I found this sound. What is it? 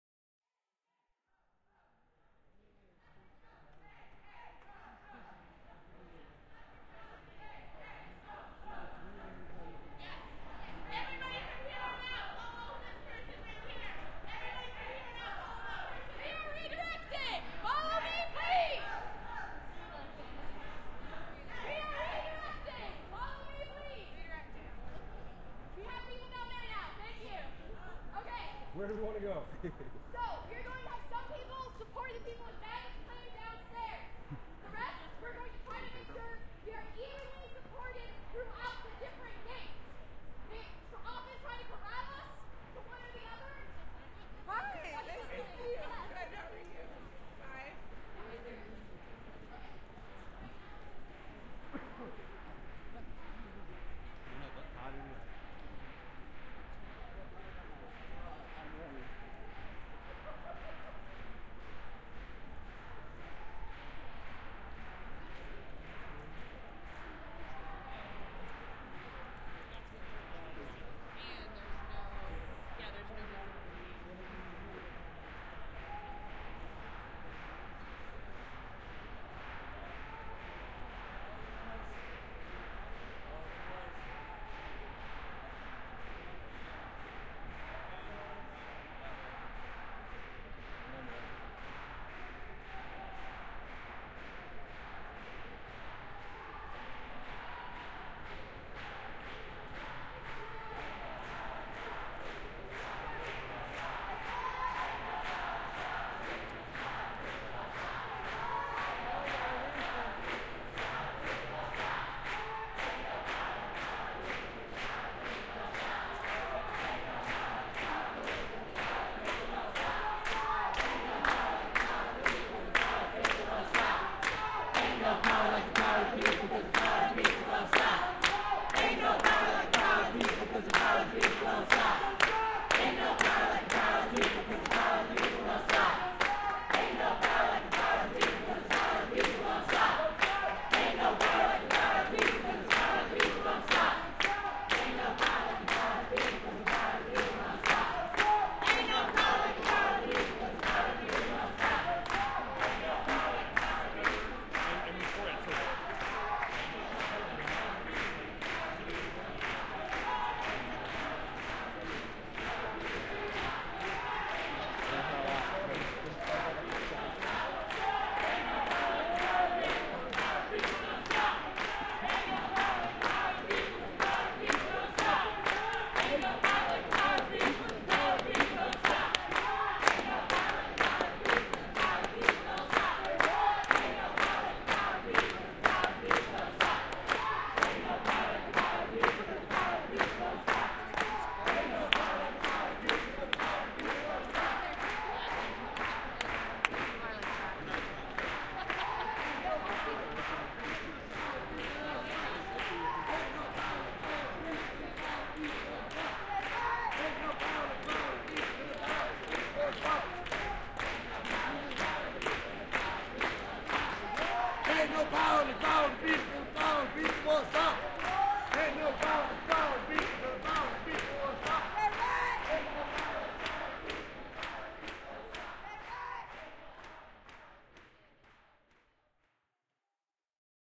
Power Of People: Sea-Tac Airport Travel Ban/Immigration Protest
"Ain't no power but the power of the people and the power of the people don't stop"
This recording is a bit of a slow burn in that it starts off with quiet and sounds of organizing/directing, but ends in beautiful enthusiasm and hope. I feel like we could use some of this a year on...
Field/protest recording
Sea-Tac Airport, Seattle, WA, US
Immigration/Travel Ban Protest
1/28/2017?
Source:
DPA 4060 mics (used as binaural) -> Sound Devices 702